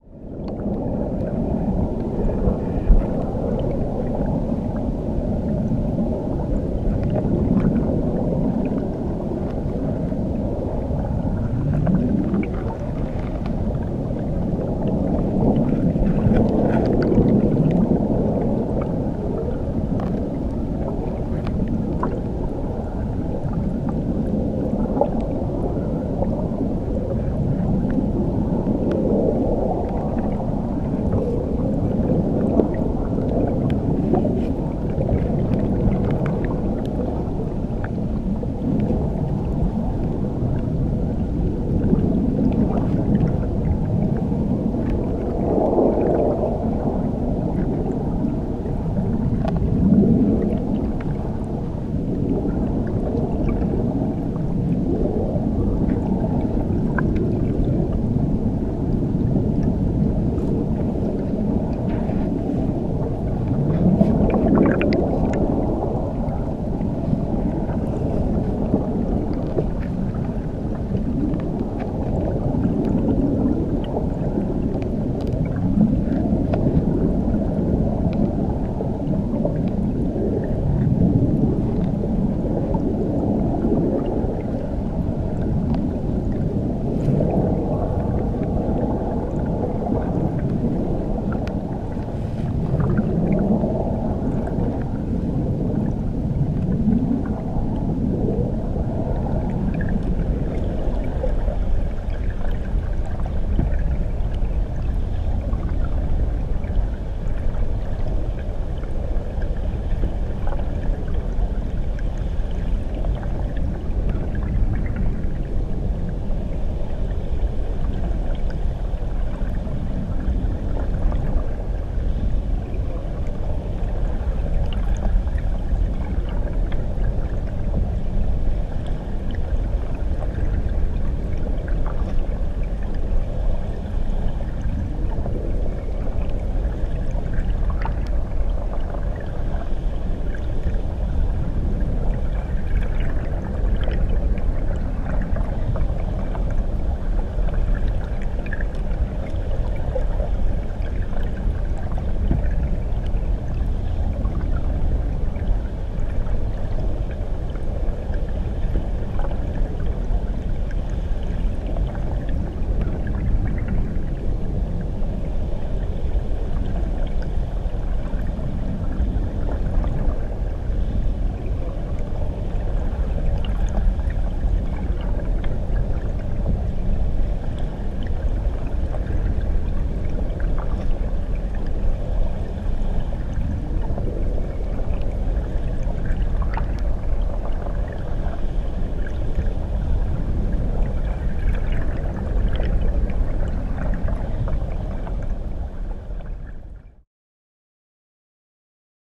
Under the waterfall
Underwater stream sound near to a noisy waterfall in a small river.
Recorded with GoPro Hero3+ Silver Edition.
river, underwater, stream, waterfall